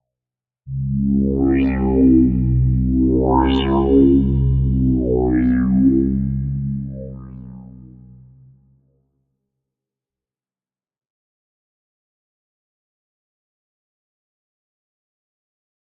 An evolving bass/pad I patched using subtractive synthesis. Convolution reverb added as well as some subtle distortion and post synth filtering. Please give me a mention if you use this :-)